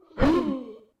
Punch sound. Recording vocal textures to recreate the damage to the mutant. Zhile (Videogame)
Animal, Kick, punch, Hit, Blood, Fist, Wood, Monster, Hurt, Push, Tile